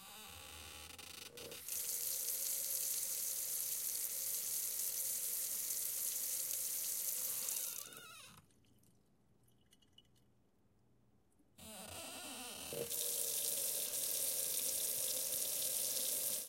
ARiggs SinkFaucetRunning 4.2.14 3
Sink Faucet Turning On and Off
-Recorded on Tascam Dr2d
-Stereo
Sink, Faucet, Splash, On, Kitchen, Liquid, Running, Off